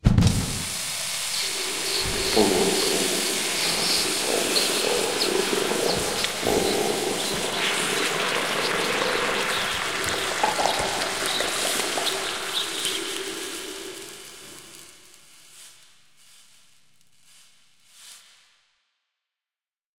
CR VampireStaked
Vampire staking sequence - vampire melts into goo
melt, sizzle, vampire